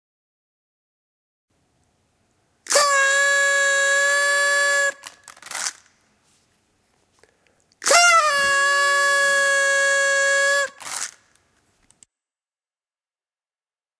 typical roll-out whistle